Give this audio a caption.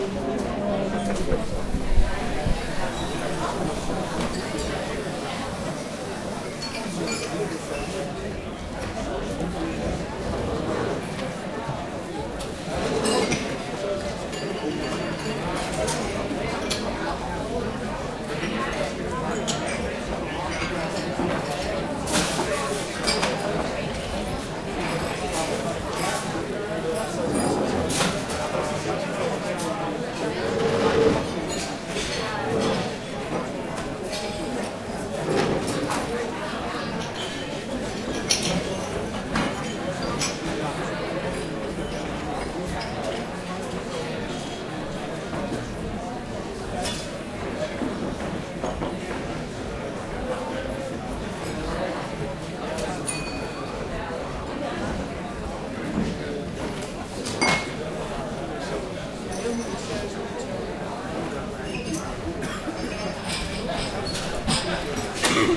Crowd talking in Restaurant Eye, Amsterdam
Zoom iQ6 X/Y recording of a busy Dutch restaurant, EYE in Amsterdam.
conversation, chatter, soundscape, talking, eye, dutch, people, ambience, ambient, chat, crowd, walla, ambiance, background-sound, general-noise, amsterdam, atmosphere, voices, atmos, field-recording, restaurant